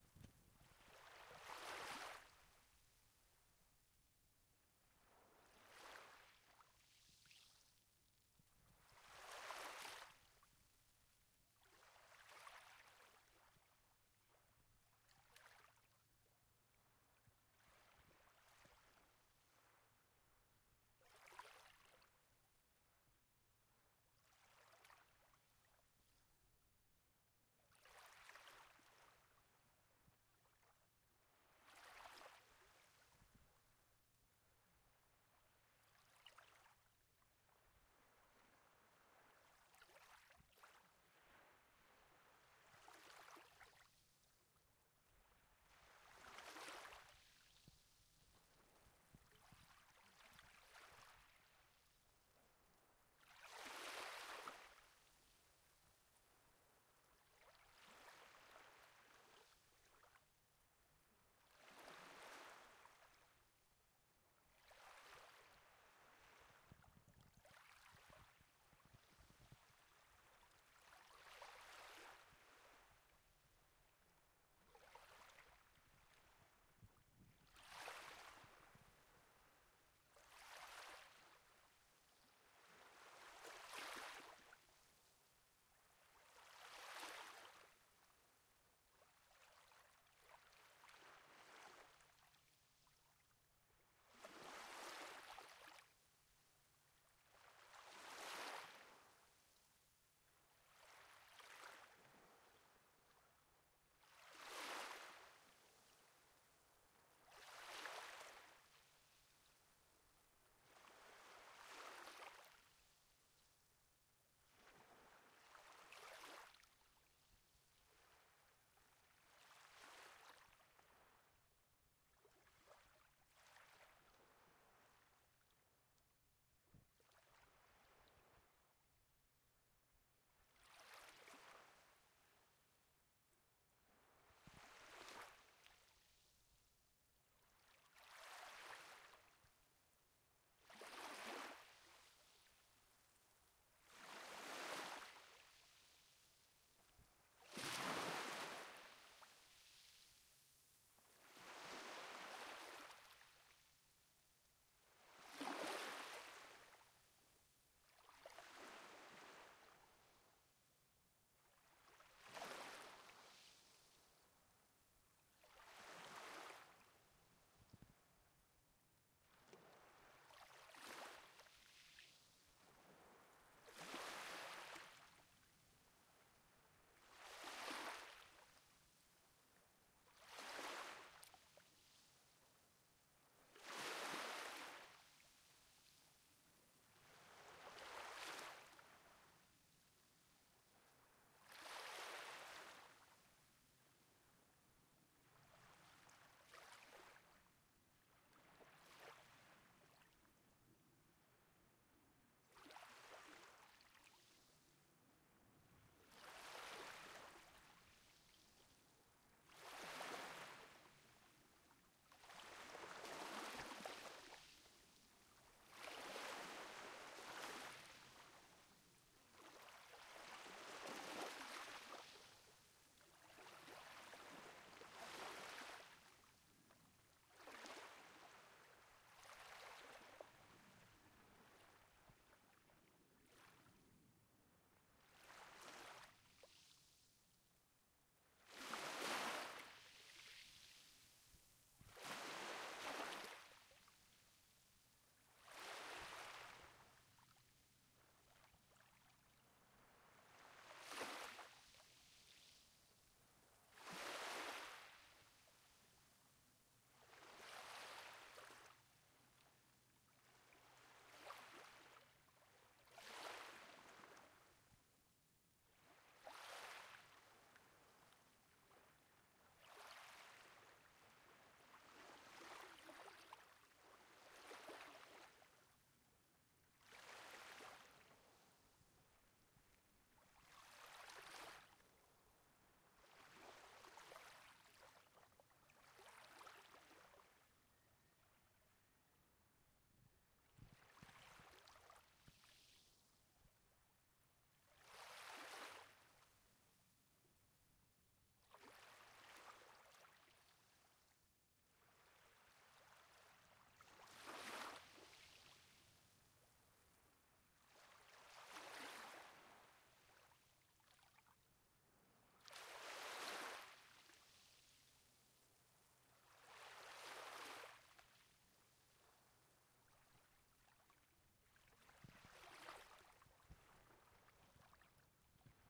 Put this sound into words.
Soft Wave for relaxation - Japan Setouchi
Listen to this relaxing waves from the small town of Ushimado by the Seto Inland Sea Japan.
relaxation, wave, meditative, relax, meditation, relaxing